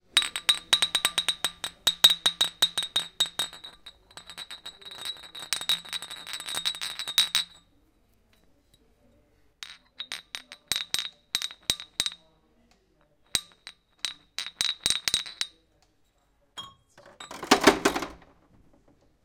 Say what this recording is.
Glass bottles dinging and then getting put back in their plastic box.
Recorded with Zoom H2. Edited with Audacity.
clang, cling, bottles, ting